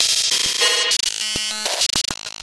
glitch perc 19
A rhythmic loop of a processed cymbal sound.